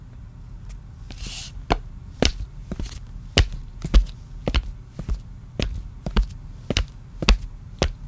walking footsteps flat shoes tile floor 5
A woman walking in flat shoes (flats) on tile floor. Made with my hands inside shoes in my basement.
female, flat, floor, footsteps, shoes, walking